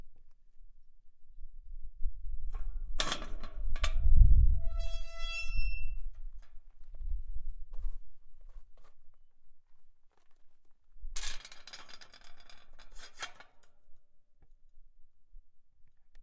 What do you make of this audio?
Metal Door
A wrought iron gate in Tzia